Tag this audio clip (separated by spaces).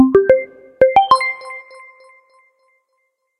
sounds attention chime